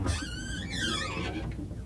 Wood door squeak sound effect I made for a video game I developed.
Close, Closing, Creak, Creaking, Door, Old, Open, Squeak, Squeaking, Wooden